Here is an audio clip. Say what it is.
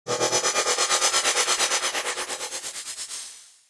FX Transition
A fluttering transition effect.
processed, flutter, effect, FX, transition, space, ableton, electronic, synthesized